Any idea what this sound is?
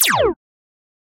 Laser Classic Shot 1
Clasic Laser/Raygun shot.